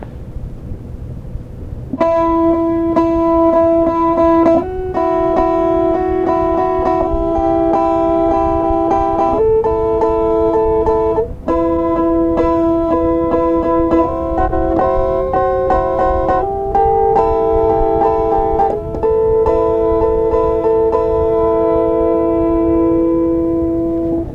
Portable cassette recorder gets sound of acoustic guitar playing a mostly-ascending sequence of 1, then 2, then 3 notes.
collab-2, cassette, lofi, noisy